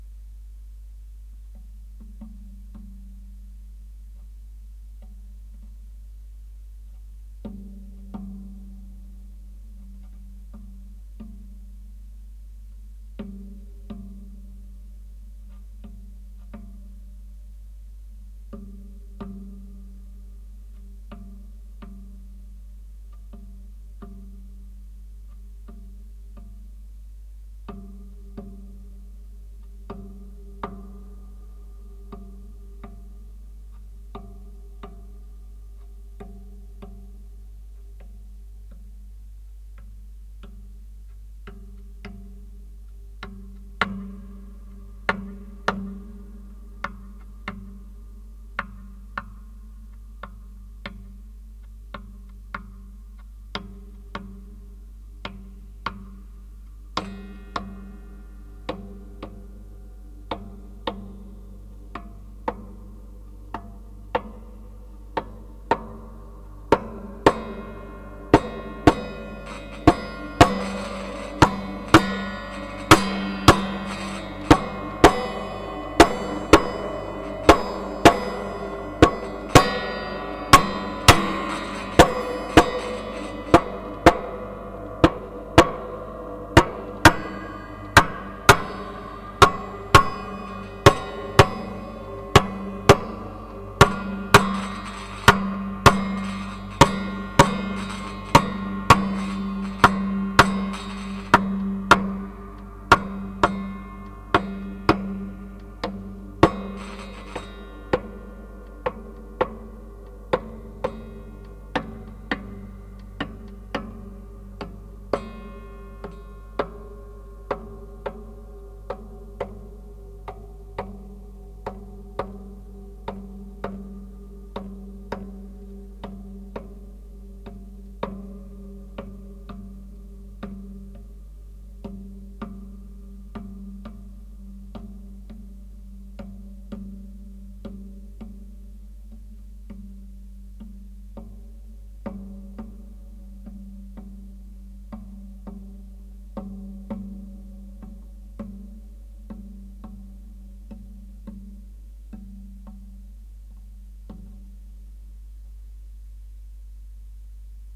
A skeleton is stumbling in the night, one foot missing, passing you at short distance and then vanishes in the shadows.
bones, creepy, darkness, ghost, haunted, horror, horror-effects, mystery, night, skeleton, spooky